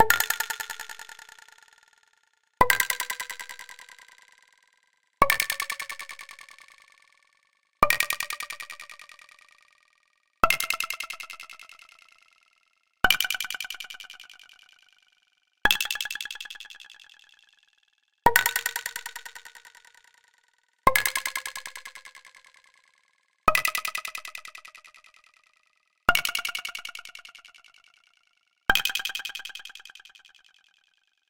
microphone + VST plugins
effect, fx, sfx, sound